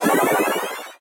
magic-spell-06
magical, magic, wizard, magician, witch, spell, rpg, game-sound